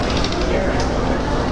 A case with a broken wheel makes some percussive clicks as it is pulled.
This
sample is part of a set of field recordings made around Montreal
Trudeau airport in summer 2006, various sounds and voices make
themselves heard as I walk through to the check-in.
canada, airport, clicking, percussion, montreal, field-recording, lo-fi